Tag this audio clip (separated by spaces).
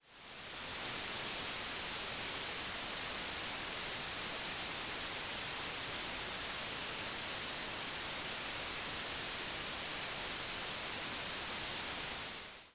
Futuristic Noise